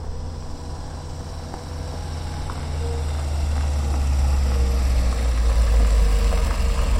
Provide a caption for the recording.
S04=08 Car-arrive
Car arriving sound, modified.
arrive, car, stopping